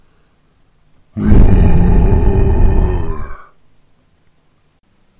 Deep Monster Roar 2
A deep roar a monster would make.